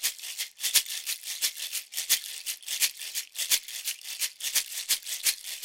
PDLL - Shaker
Palo de lluvia single short sound
85bpm
sounds recorded with an akg c3000 in my home studio.
palo, rain, shaker